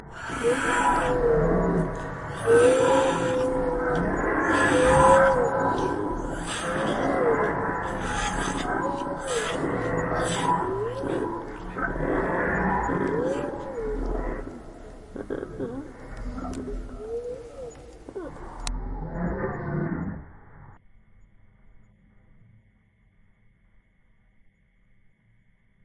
Raptors- Don't open that door!